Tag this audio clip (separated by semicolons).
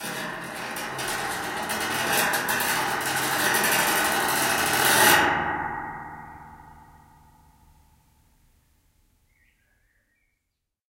atmospheric; creepy; dr-05; dramatic; dynamic; ghosts; haunted; metall; mysterious; phantom; scary; spooky; stereo; tascam; trapdoor